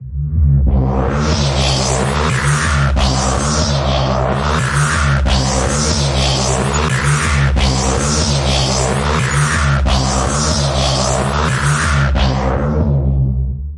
Reese // Reece
dark, dnb, neurofunk, hard, Reece, distorted, bass, drum, reese